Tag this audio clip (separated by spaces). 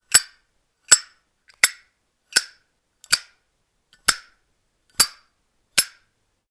lighter closed lid zippo metal